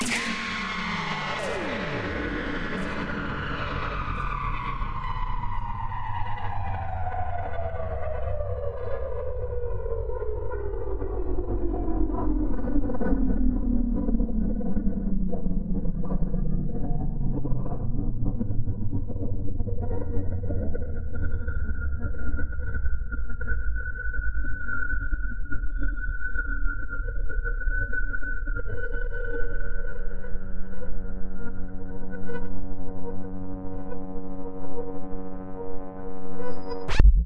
Electrical sting that descends